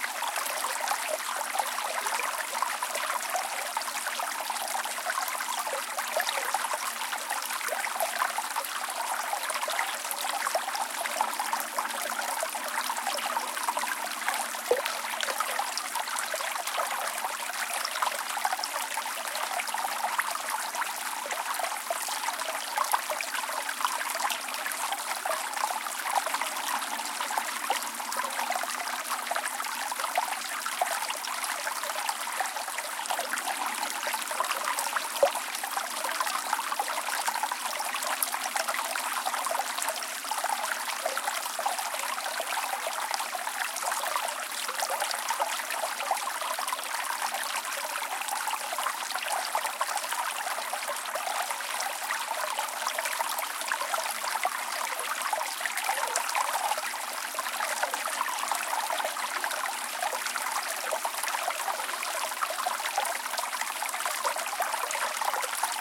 1003 - stream loop2
Stereo loopable recording of a small trickling stream. Recorded with a pair of crown "soundgrabber II's" taped to the sides of a cardboard box for stereo imaging similar to that of a human head. Rolled off low frequency rumble from nearby auto traffic.